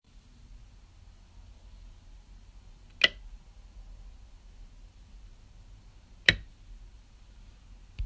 A light switch in an old house
flip, light, off, switch, switched, switches, switching, turned, turns